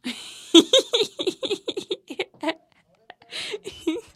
risa Andrea
Linda Risa de una mujer joven.
Cute Laug´s girl.
laugh, happiness, empirical, mujer, woman, laughter, risa